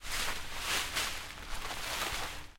Rustling foilage 2
Recording of movement through some bushes. good for general foley use.